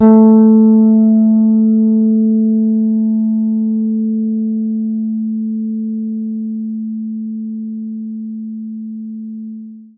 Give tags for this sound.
bass
guitar
tone

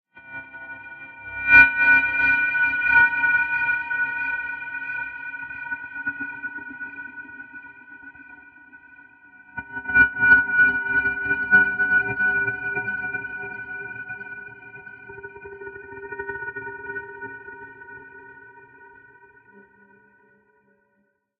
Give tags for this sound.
trippy
experimental
spacey
high-pitched
effect
sweetener
time
sound
sfx
dilation
sci-fi

